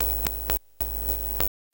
inspired by ryoji ikeda, ive recorded the sounding of me touching with my fingers and licking the minijac of a cable connected to the line-in entry of my pc. basically different ffffffff, trrrrrrr, and glllllll with a minimal- noisy sound...